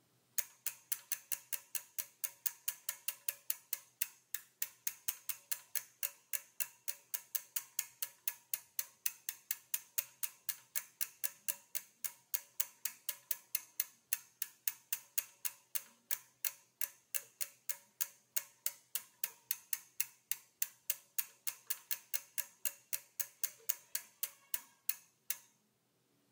Bike wheel, coasting, medium speed
Bicycle rear wheel spinning freely, medium speed
wheels, spinning, bicycle, coasting, gears, clicking, Bike, spokes